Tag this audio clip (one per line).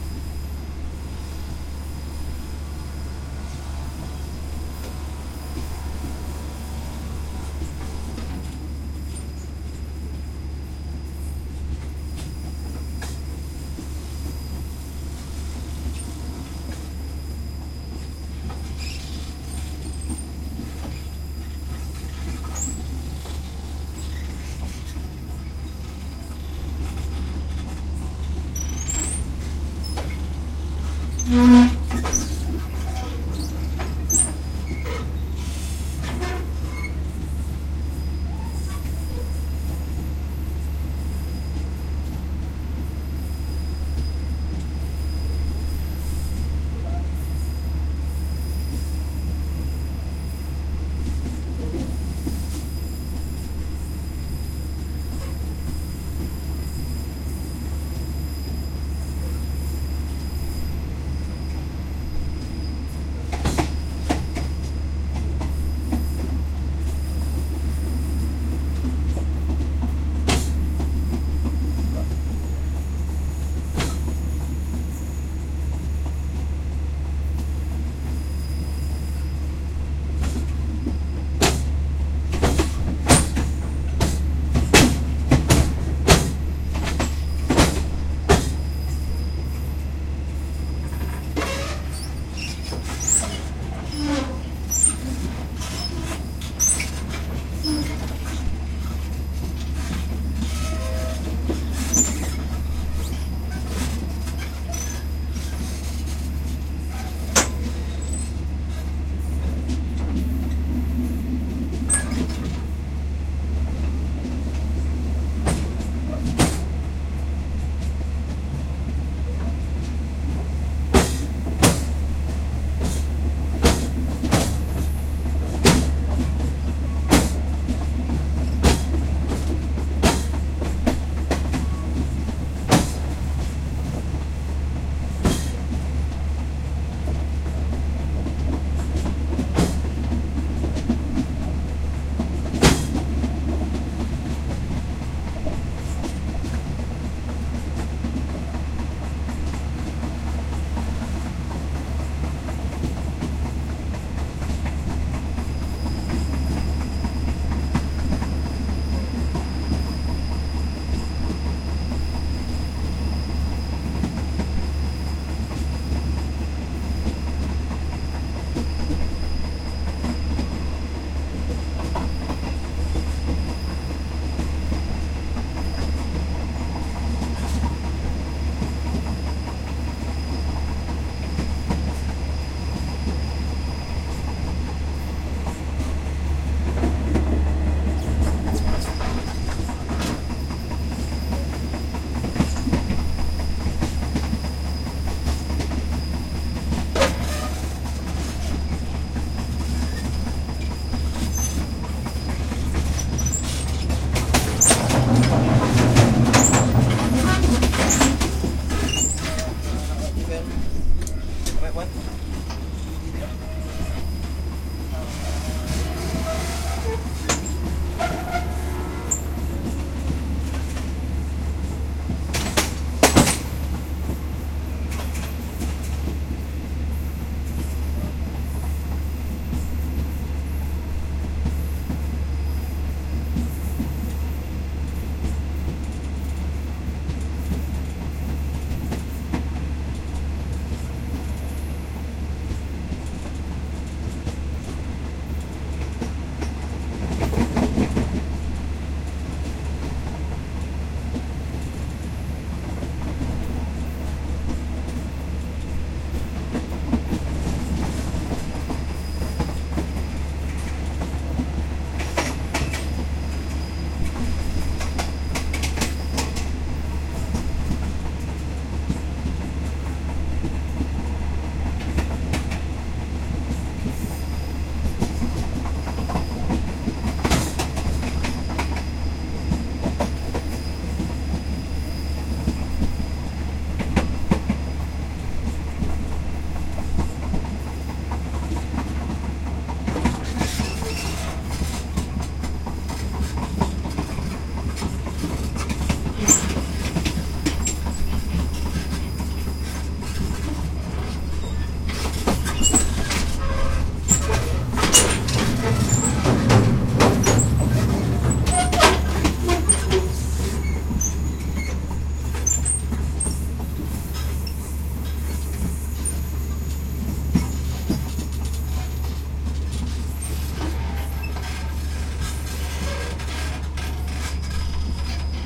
soundscape
impact
thailand
thai
metal
transport
noise
atmosphere
field-recording
transportation
screech
squeaking
ambient
grinding
train
ambience
tension
industrial